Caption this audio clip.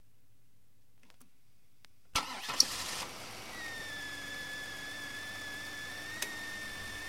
car start belt side(with wine)
this is a recording of a 2000 Buick Lesabre being started with on the side of the engine with the belt.